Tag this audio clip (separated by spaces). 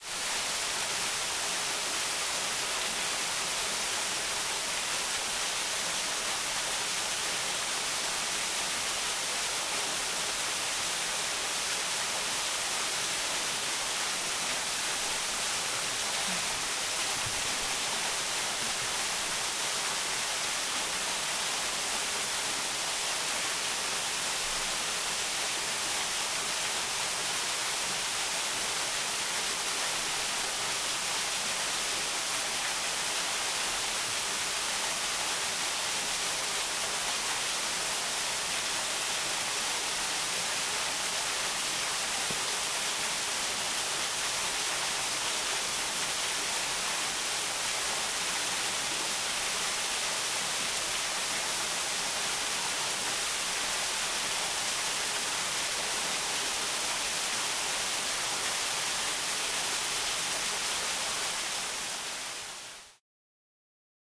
sharp-waterfall field-recording water small-waterfall